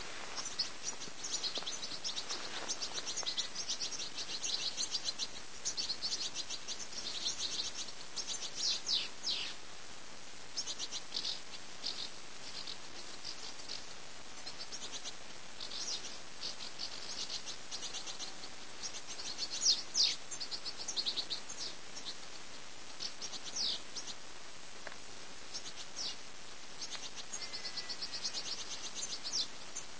A flock of house martins seen around an old farmhouse in the Langdales, middle of the Lake District. There might be a couple of goldfinch tweets in there, and there's a faint sheep near the end!
bird, house-martins, birds, bird-song, house-martin, lake-district